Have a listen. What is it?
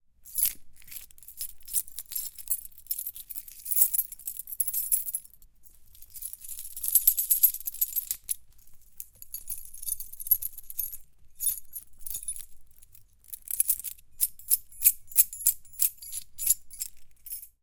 Miked at 4-6" distance.
Keys jingled.
metallic, foley, jingle, chainmail, keys